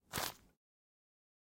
Sound of grabbing stuff or something
film, game, games, grabbing, movie, stuff, video